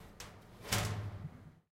Sound Description: throwing something in a PO box
Recording Device: Zoom H2next with xy-capsule
Location: Universität zu Köln, Humanwissenschaftliche Fakultät, Herbert-Lewin-Str. in the IBW building, ground floor
Lat: 50.93417
Lon: 6.92139
Date Recorded: 2014-11-25
Recorded by: Kristin Ventur and edited by: Darius Thies
This recording was created during the seminar "Gestaltung auditiver Medien" (WS 2014/2015) Intermedia, Bachelor of Arts, University of Cologne.